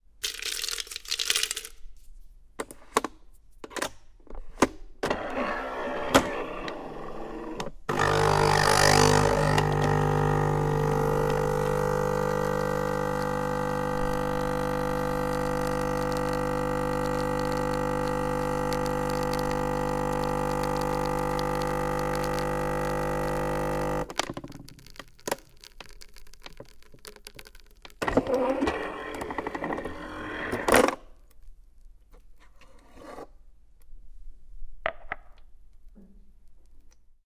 Coffee Machine Capsules
bar, cafe, coffee, coffee-machine, espresso, home, kitchen, machine, nespresso, restaurant